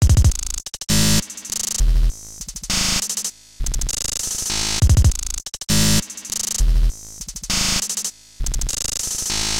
glitch stutter loop 200BPM
A quick glitch sound I made.
200, beat, bpm, glitch, gross, loop, stutter